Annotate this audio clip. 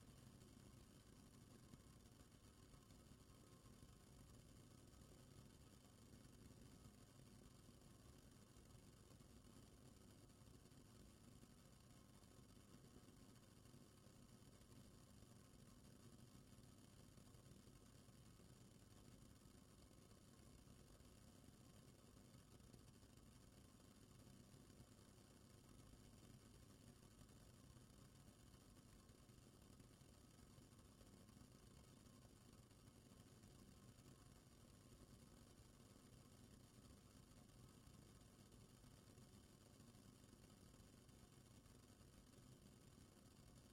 simmering water in pot
* simmering water
* in kitchen
* in pot (stainless steel)
* induction stove
* post processing: none
* microphone: AKG C214
boil, boiling, cook, hot, kitchen, liquid, pot, simmering, water